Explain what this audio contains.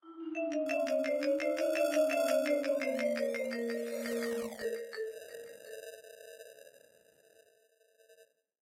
A marimba with multiple effects applied